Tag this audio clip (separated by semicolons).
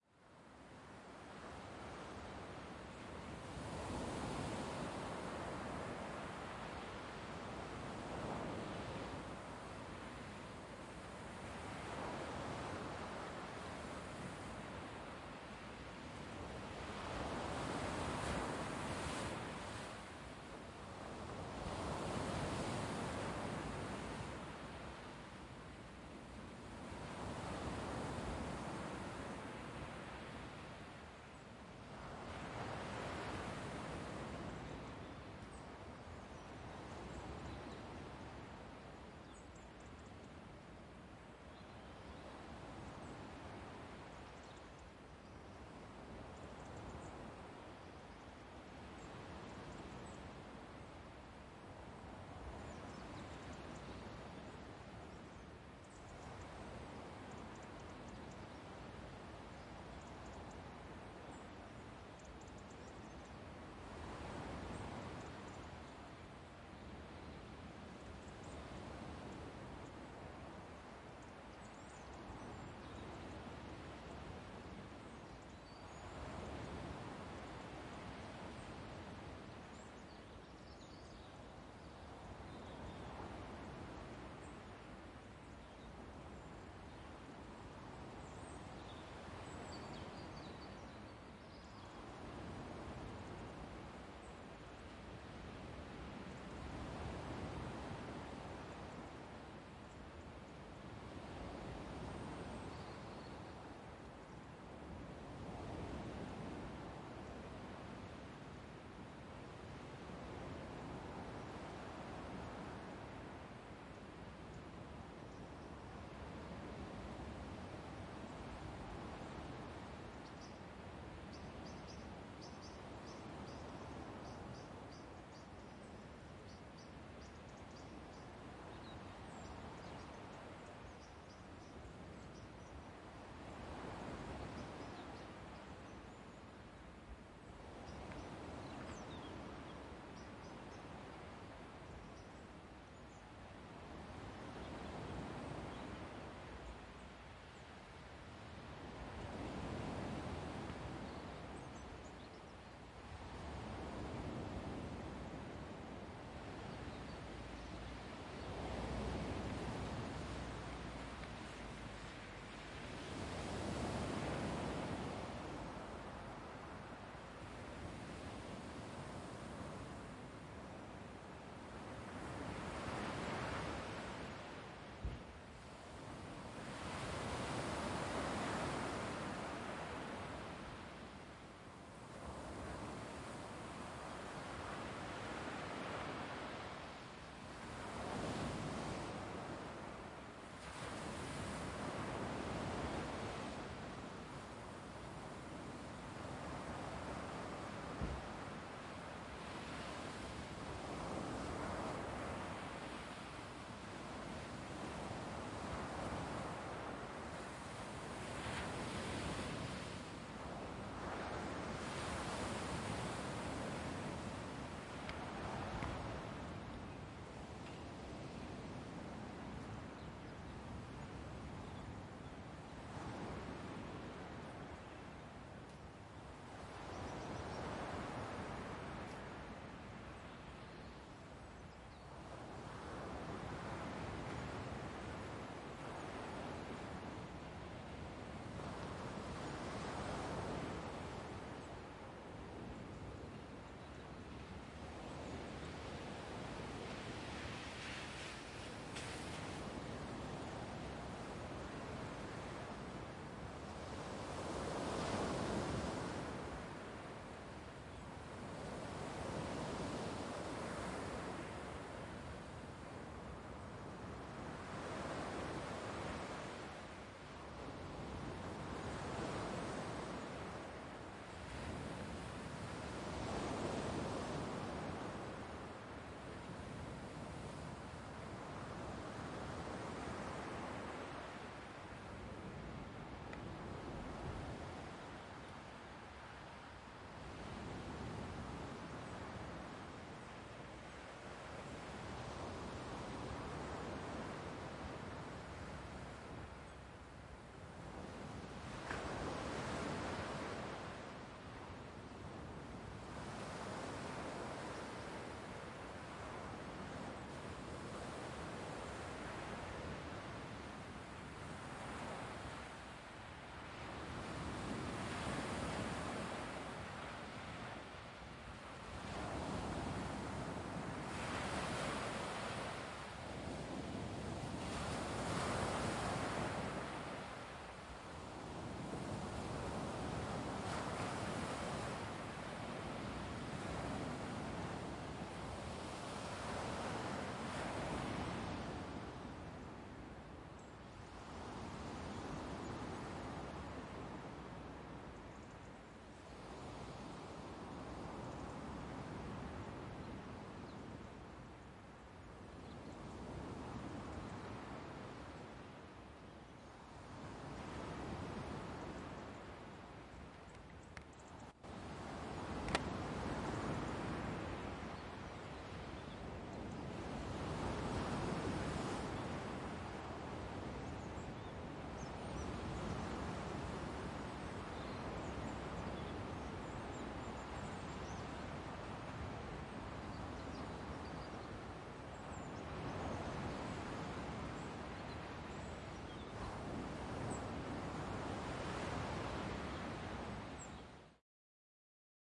field; ambient; waves; sea; recording; dawn